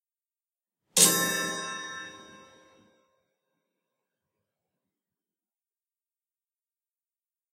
Plastic pen striking several simultaneous rods from this set of grandfather clock chimes:
Set contains eight rods roughly corresponding to these notes in scientific pitch notation: D#4, F4, G4, G#4, A#4, C5, D5, and D#5. Some were intentionally muted with my fingers while striking. I don't remember which (and don't have the ear to tell casually ... sorry), but they are the same notes as in other variants of this sound in the sound pack. Intended for organic non-sample-identical repetition like when a real clock strikes the hour.
Recorded with internal mic of 21.5-inch, Late 2009 iMac (sorry to all audio pros 😢).